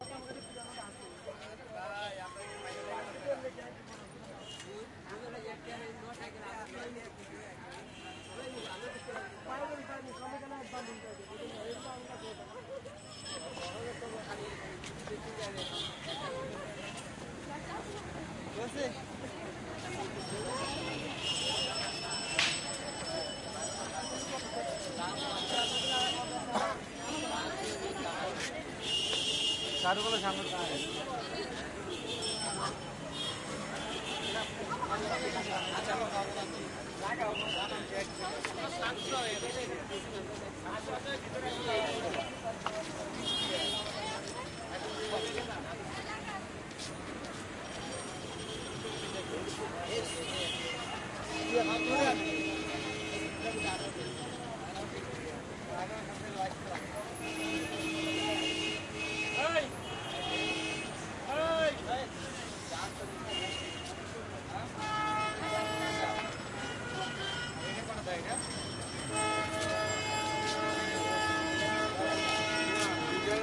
stemmer i trafik[1]
Traffic in Bangladesh
bangladesh, city, voices, traffic